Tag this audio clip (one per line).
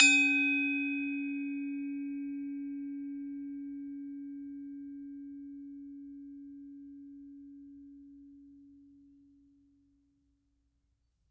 bali
percussion
gamelan